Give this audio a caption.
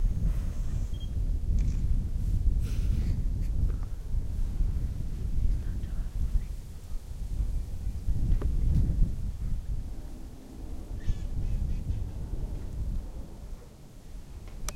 son de camara
This is a mix of nature sounds and sounds of a captures with a camera. Recorded with a Zoom H1 recorder.
birds, Llobregat, camera, field-recording, Deltasona, forest, nature